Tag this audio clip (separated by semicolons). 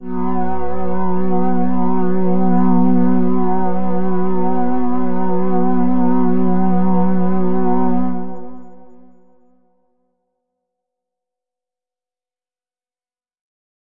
chord; organ; pad; space; synthetic